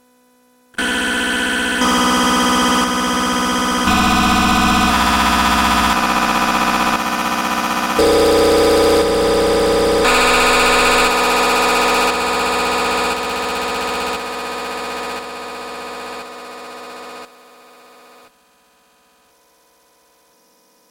Breathing Industrial Game: Different breathing with some distortion. Sampled into Ableton using distortions like Trash2, compression using PSP Compressor2. Recorded using a SM58 mic into UA-25EX. Crazy sounds is what I do.